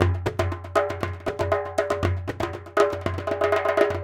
Doumbek Loop Stereo3
Recording of my personal Doumbek 12”x20” goblet hand drum, manufactured by Mid-East Percussion, it has an aluminum shell, and I installed a goat-skin head. Recording captured by X/Y orientation stereo overhead PZM microphones. I have captured individual articulations including: doum (center resonant hit), tek (rim with non-dominant hand), ka (rim with dominant hand), mute (center stopped with cupped hand), slap (flat of hand), etcetera. In addition I have included some basic rhythm loops which can be mixed and matched to create a simple percussion backing part. Feedback on the samples is welcome; use and enjoy!
African, Darabuka, Djembe, Doumbec, drum, dumbek, Egyptian, hand, Middle-East, percussion, Silk-Road, stereo, Tombek